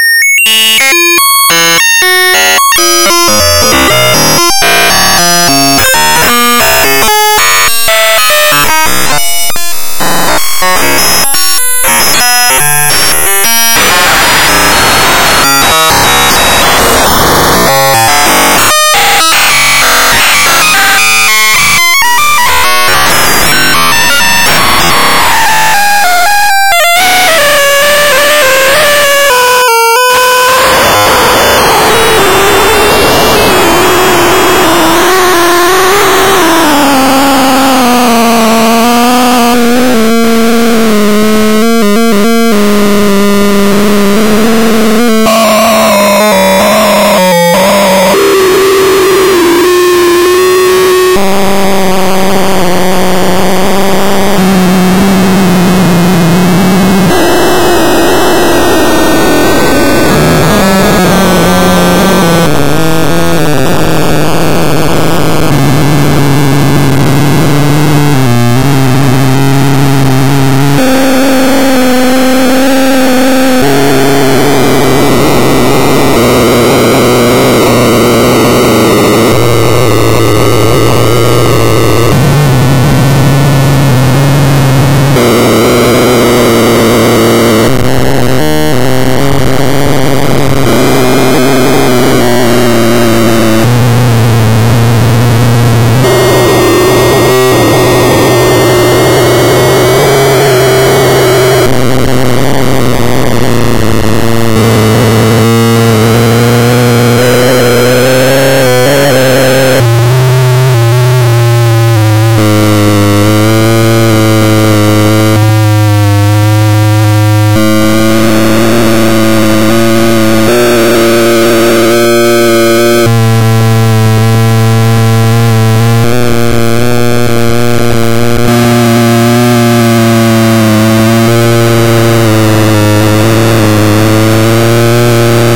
glitchy modem-type noises #4, changing periodically a bit like sample and hold, random walk through a parameter space, quite noisy. (similar to #6, except with slower S&H rate). these sounds were the results of an experimental program i wrote to see what could be (really) efficiently synthesized using only a few instructions on an 8 bit device. the parameters were randomly modulated. i later used them for a piece called "no noise is good noise". the source code was posted to the music-dsp mailing list but i can't find it right now.